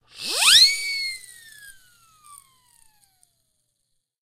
whee whistle
one of those whistles that sounds like "whee"